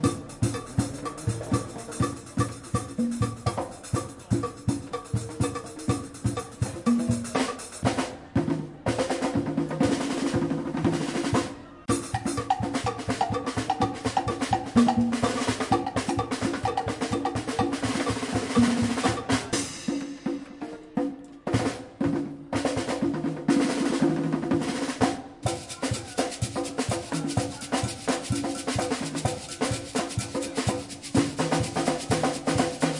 Latin Styled Street Percussion
Recorded street performer in Bern, Switzerland, using a Zoom H4N Pro in June 2017.
Formatted for Make Noise Morphagene.
switzerland, make-noise, street, morphagene, field-recording, performer, percussion, bern, drums, mgreel